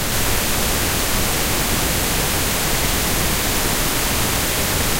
independent pink noise delay
Independent channel stereo pink noise created with Cool Edit 96. Delay effect applied..ied..ied..ied.